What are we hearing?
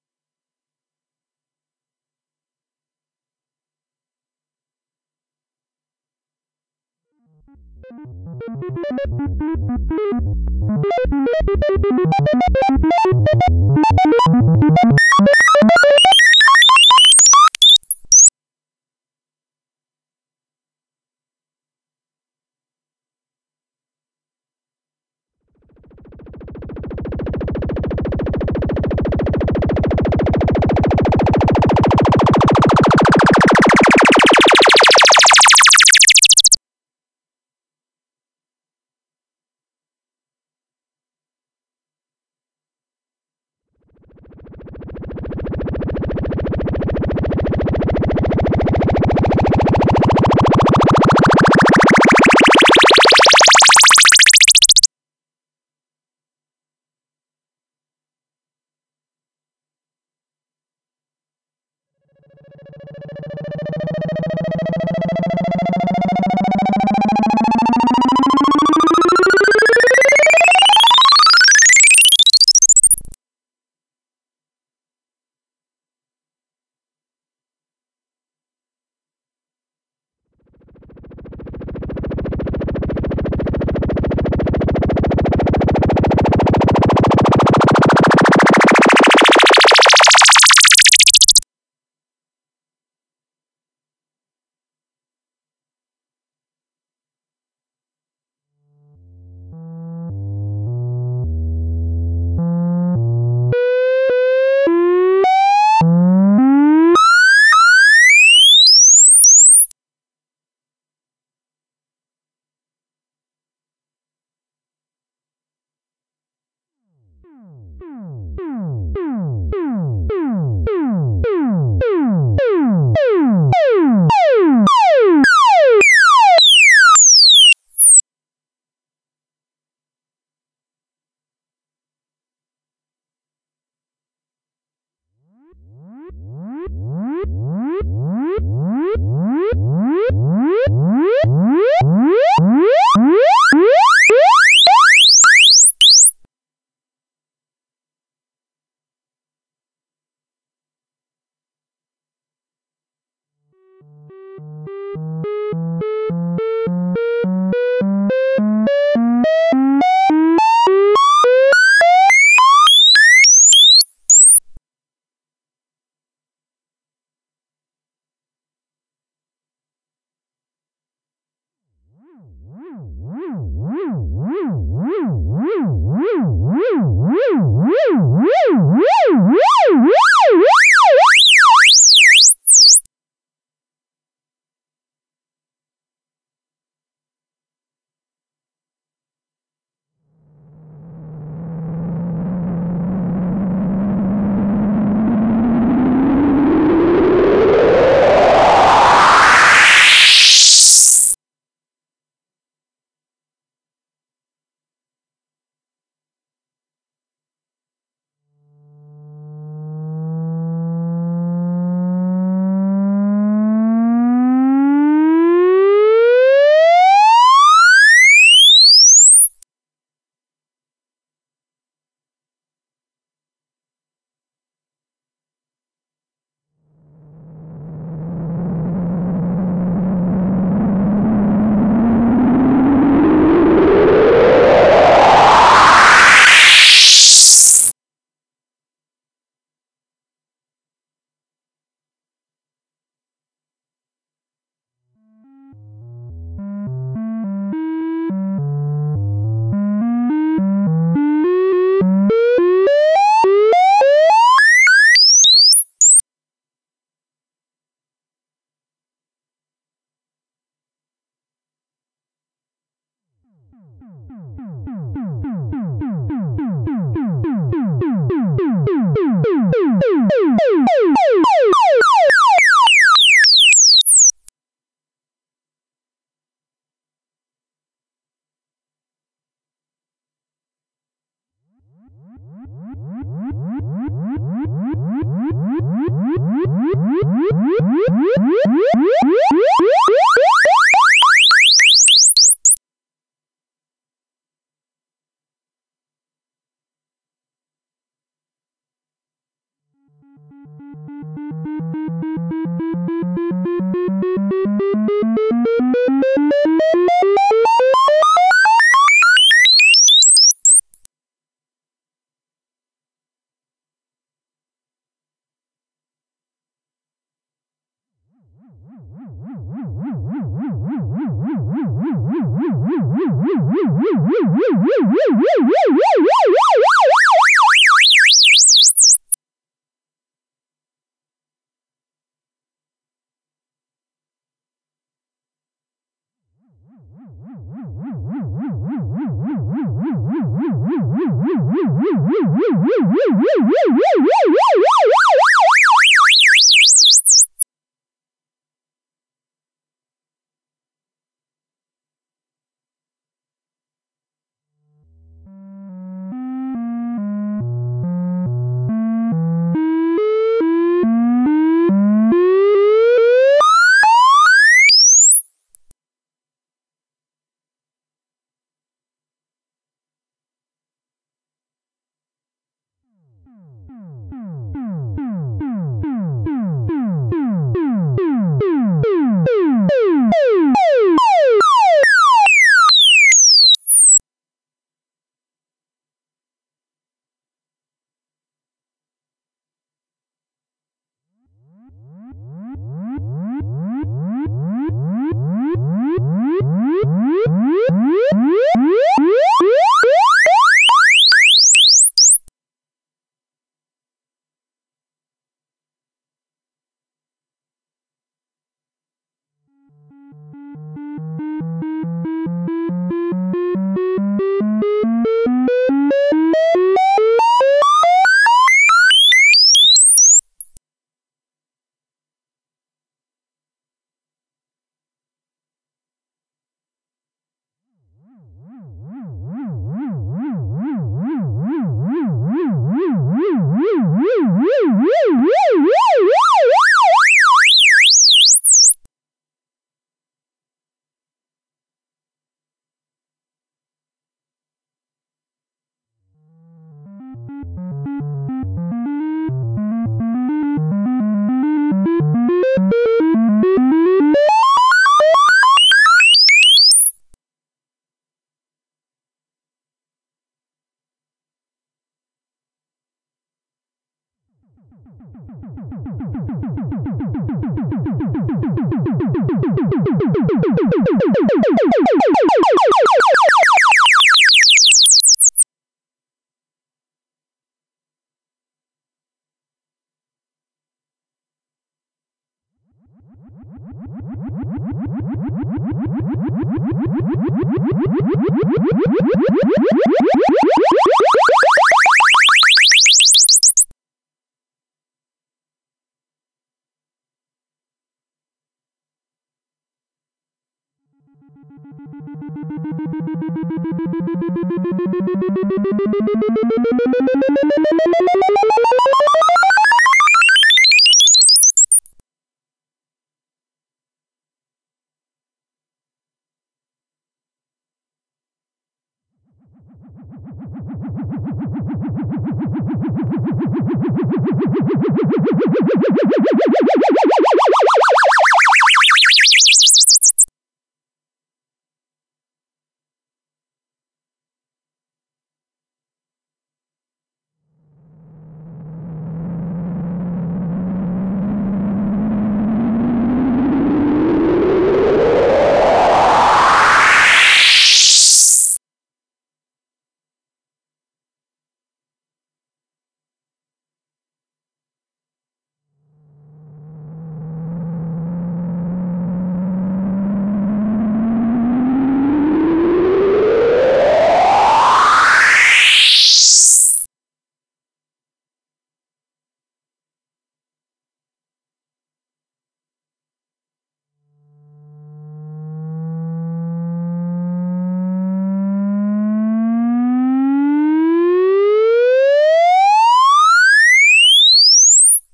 These are some bleeps and sweeps I made with my Moog Little Phatty Stage II synthesizer.
Each single sample in this file have different modulation settings.
I used misc LFO waves and LFO clock division settings (1/16, 1/8t, 1/4, 1/32).
The LFO master tempo is 105 BPM.
This file is the reversed version of another sample which I've uploaded here.